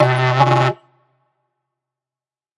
Dino Call 11
short didgeridoo "shot" with some reverb. enjoy
didgeridoo, yidaki, artificial, deep, short, oneshot, effect